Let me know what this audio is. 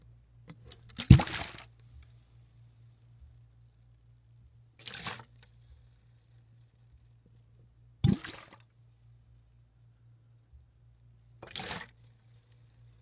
Sloshes In Big Bottle FF264
Liquid sloshes in larger bottle, shorter, hitting sies of container, loudest
bottle, Liquid, sloshes